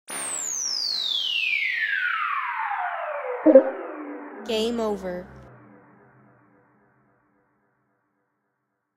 Losing at a game in an arcade. This was created with GarageBand. Created on October 4th.
Thanks!